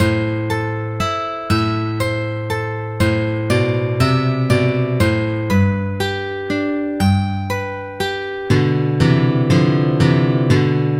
Also an oldie, one I thought up on guitar myself,this time acoustic. Just a few simple chords and some ornaments(I put the notes into a sample instrument of MMM2006)...